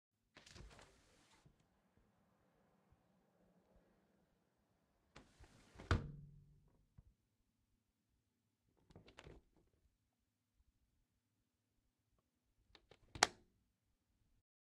FX Window 01
Opening and closing a window.
bang, lock, open, shut